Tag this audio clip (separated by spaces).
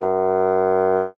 fagott wind classical